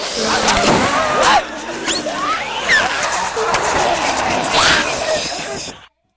Zombie Horde
=USE FOR ANYTHING=
Zombie noises, made by recording myself making several zombie noises, and combining them.
=MADE IN AUDACITY=